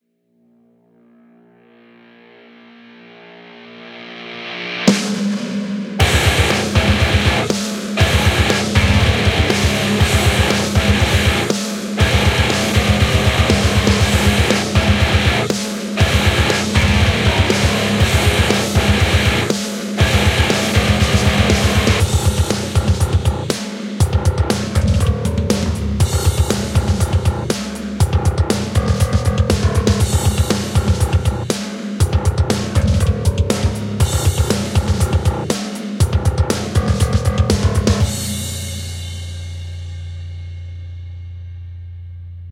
Metal Song Short15
Metal beat Short 15
Wrote/Recorded a metal guitar, bass, drums section at 240-bpm.
Guitar recorded direct into a Scarlett 18i20 then used Guitar Rig 5 plugin from Native Instruments.
EZBass for bass.
EZdrummer for drums.
Additional notes:
-12.4 LUFS integrated
-0.1 dB True Peak Max.
hope this helps and is useful for your next project.
cheers,